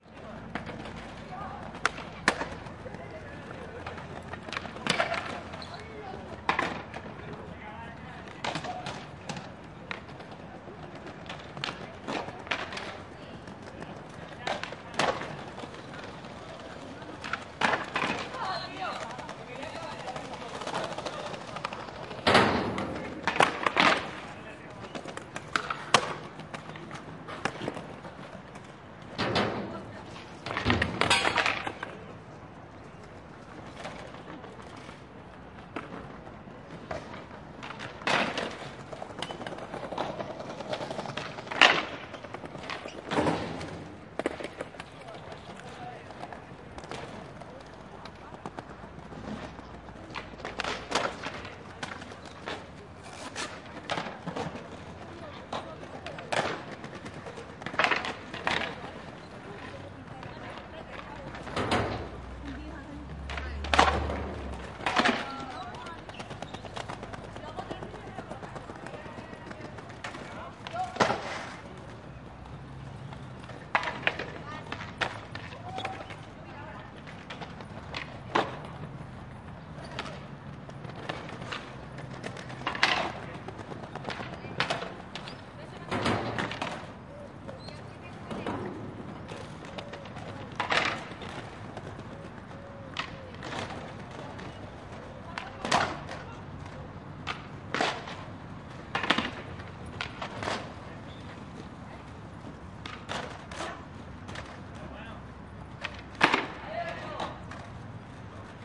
160718 HSN skateboarders 2
Many skateboarders have gathered together in the evening of Spanish Salamanca. Voices. Little dog barking. Several times one of them rides onto an iron ramp and jumps on a rail.
skateboard, Spain, street, people